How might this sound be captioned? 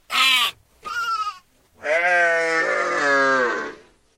Lamb Chop Slow Down Pitch Shift over 75percent
I used a time and pitch shift technique on Audacity to gradually slow down a goat "baw". It begins very quick and high pitched and then lowers in pitch as it slows down. There are two goats.
farm, berber, remix, down, chop, gradual, lamb, shift, bah, goat, pitch, aip09, slow